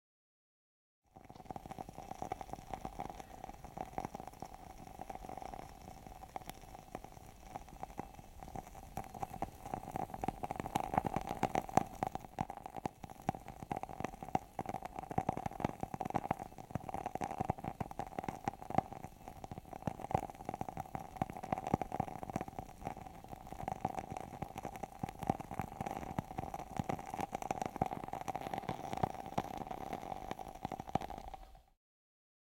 06 - Crackling candle
CZ, Czech, Pansk, Panska